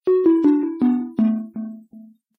game over
completed, game, level, over